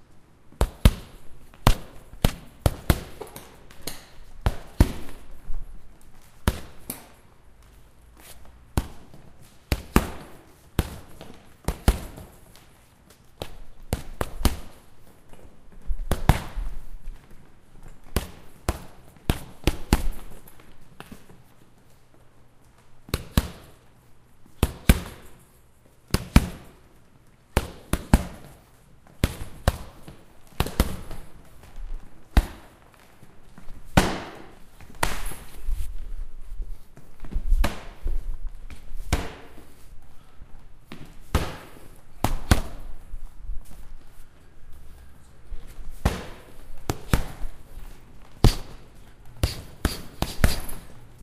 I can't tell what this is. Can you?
boxing with bag
Boxing with training bag in gym. this is a solo boxer practicing in a large room recorded with a zoom H4n used as you want.